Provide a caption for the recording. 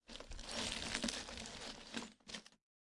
crawling-broken-glass018
Bunch of sounds I made on trying to imitate de sound effects on a (painful) scene of a videogame.
crawling, sound-effect, glass